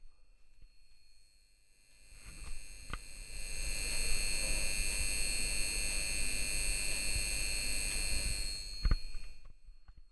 broken bulb
Short stereo recording of a malfunctioning light bulb. It's making very unpleasant sound. I have increased and decreased volume on the recorder during the recording.
After recording I switched the light off and when switching on it didn't work already :D
Recorded with Sony PCM D50.
broken, bulb, buzz, buzzing, electric, electricity, power, unpleasant, unprocessed